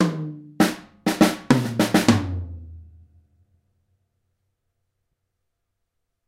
fill - 16str - toms
A straight drum fill with toms and snare. No crash.
drum, straight, toms, fill